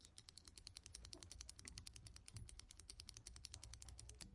Mysounds LG-FR Lindsay-ballpoint pen
CityRings, Rennes, France